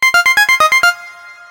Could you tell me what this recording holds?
Game Sounds 1
You may use these sounds freely if
you think they're usefull.
(they are very easy to make in nanostudio)
I edited the mixdown afterwards with oceanaudio.
33 sounds (* 2)
2 Packs the same sounds (33 Wavs) but with another Eden Synth
19-02-2014